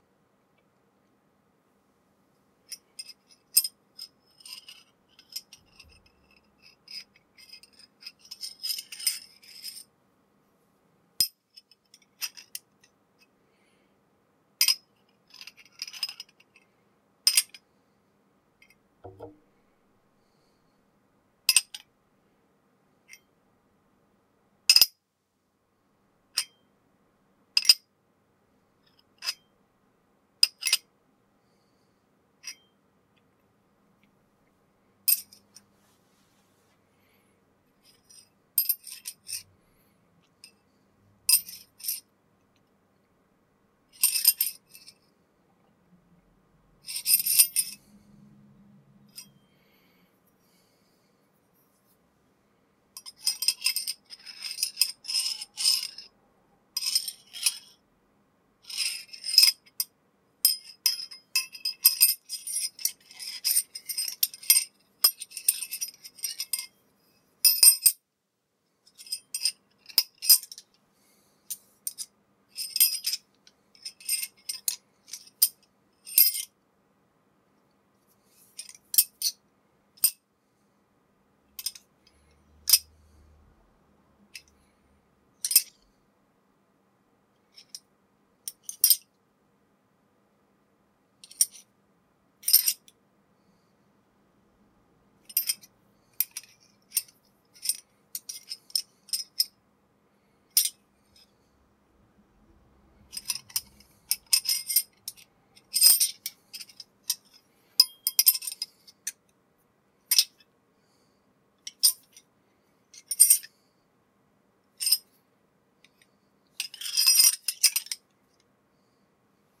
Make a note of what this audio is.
metal wrenches general handling foley
Two metal spanners / wrenches being handled in various ways. Close mic with Tascam DR100. Cleaned up a bit (light compression).
foley, handling, metal, wrench